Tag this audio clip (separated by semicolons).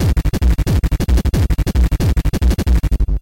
180; bpm; bassline; industrial; techno; distorted; bass